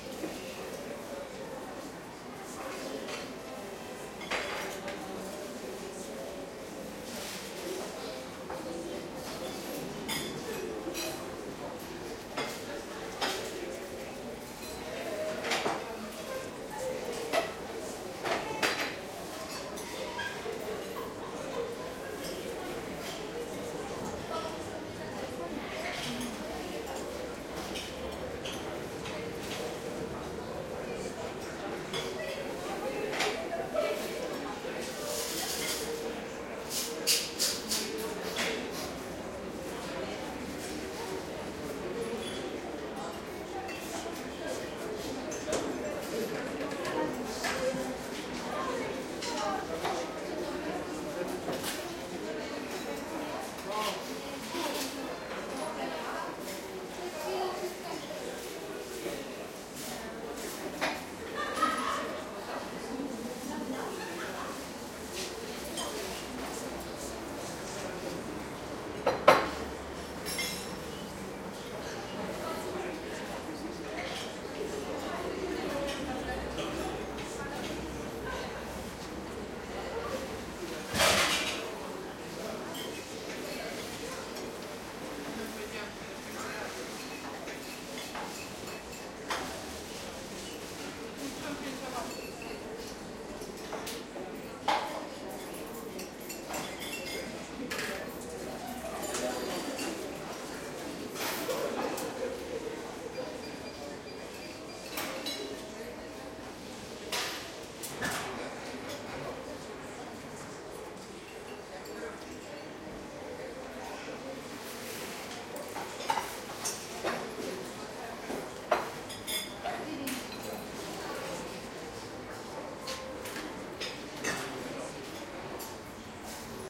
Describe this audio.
Done by layering recordings from 4 different places (canteen, cafe, 2 fast-food bars), EQ-ing and sending them into RVerb in Pro Tools. Contains few distinguishable words in Polish. Hope you like it.
Recorded with Zoom H4n (internal mic).